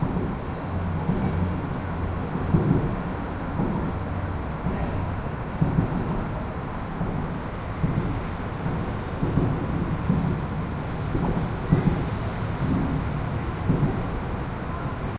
sound of cars passing through the joints of an elevated highway in the port of Genova